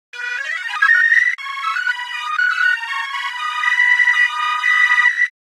I made these sounds in the freeware midi composing studio nanostudio you should try nanostudio and i used ocenaudio for additional editing also freeware
application, bleep, blip, bootup, click, clicks, desktop, effect, event, game, intro, intros, sfx, sound, startup